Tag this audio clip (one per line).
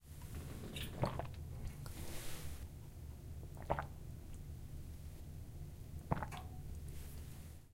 Elaine
Park
Point
Field-Recording
University
Koontz